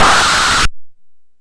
Another industrial sound.